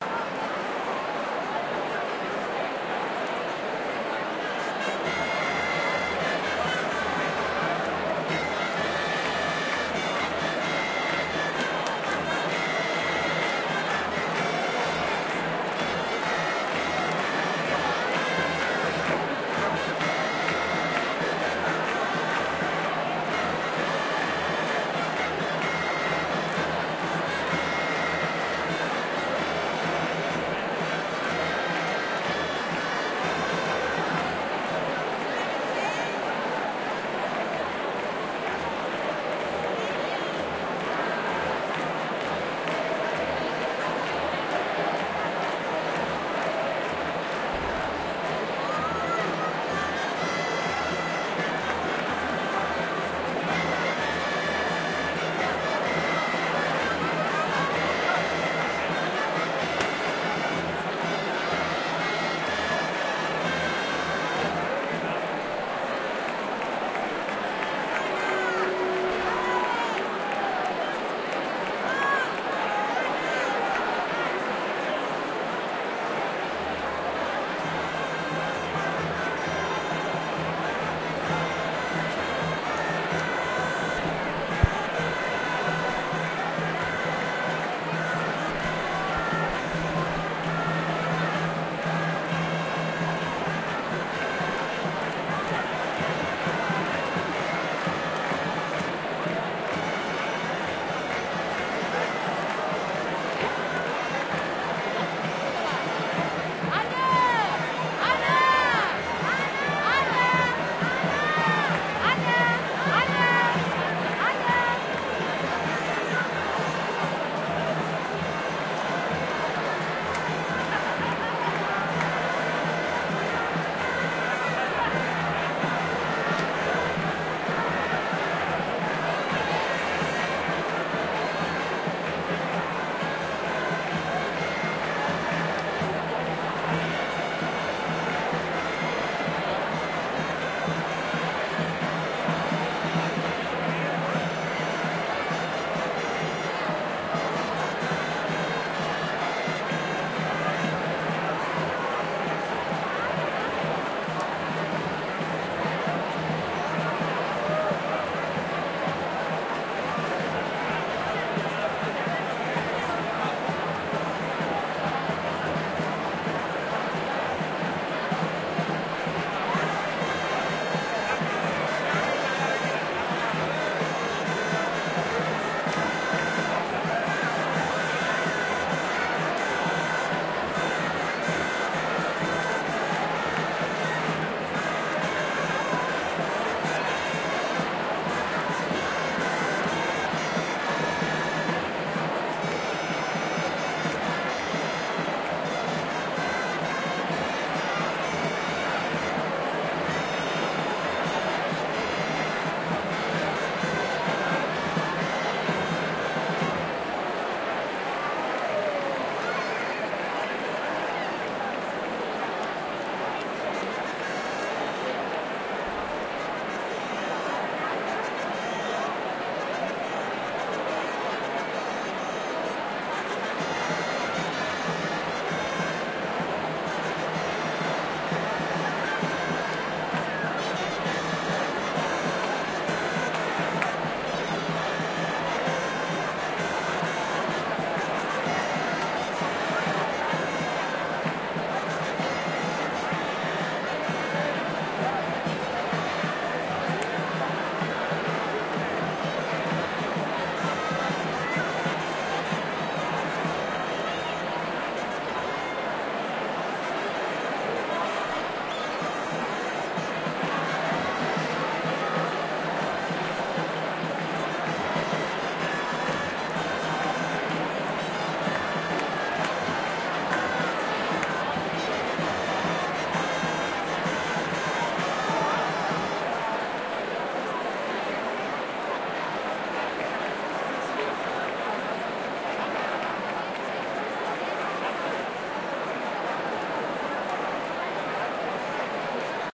ambience large crowd ext plasa sant celoni amb grallers de fons
people, celoni, fons, sant, de, plasa, ambience, large, amb, grallers, ext, crowd